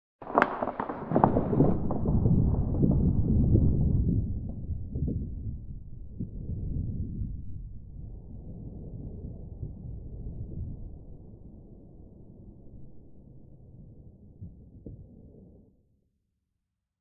Thunder sound effect. Created using layered sound of rustling baking paper. Paper was pitched down, eq'd and had reverb added.
lightning, nature, storm, thunder, thunder-storm, thunderstorm, weather